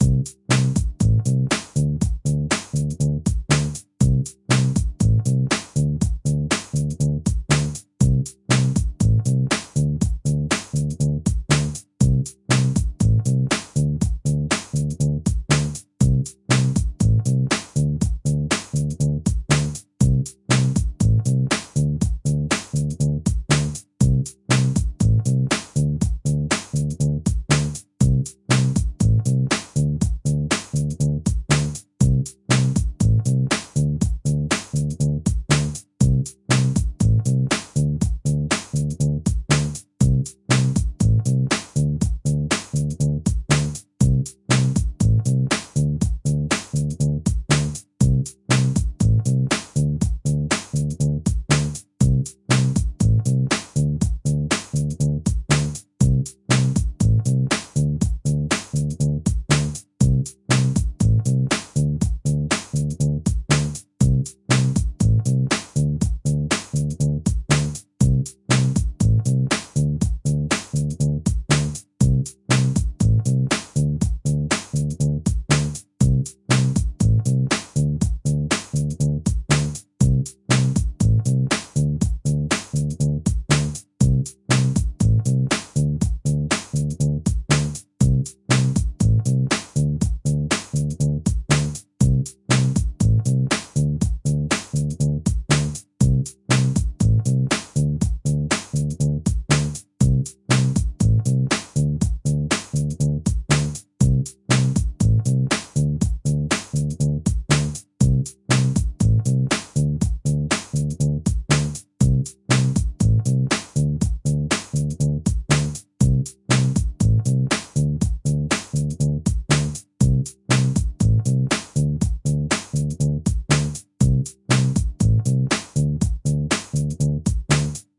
Bass loops 034 with drums long loop 120 bpm
bass, funky, drum-loop, hop, 120bpm, percs, loops, loop, groove, onlybass, drum, hip, drums, bpm, dance, 120, beat, rhythm, groovy